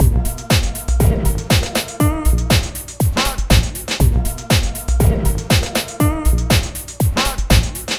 120bpm Loop P109
Processed acid-loop 120 bpm with drums and human voice